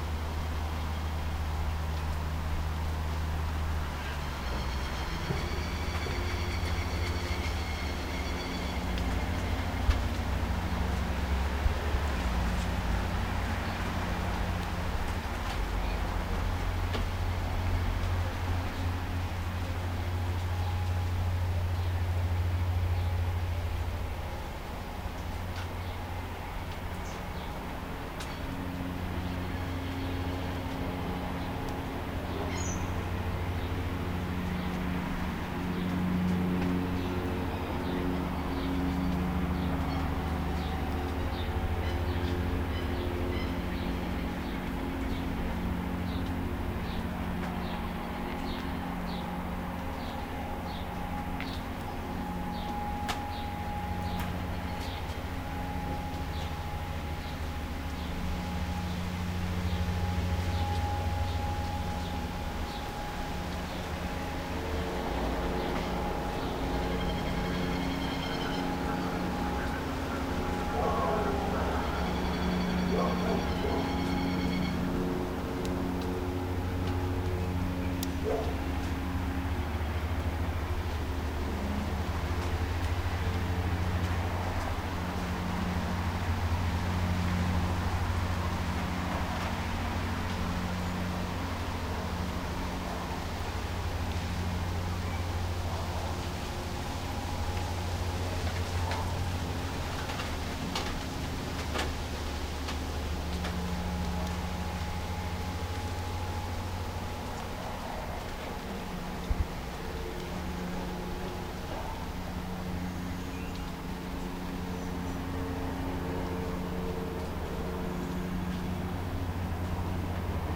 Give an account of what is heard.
Urban Atmos leaves lawnmover in distance
Suburban atmos with wind through trees and a lawnmower in distance